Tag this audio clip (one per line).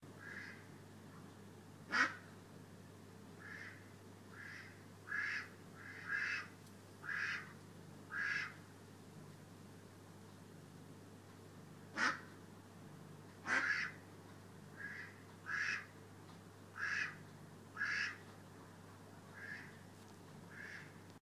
field-recording
garden
park
pond
quack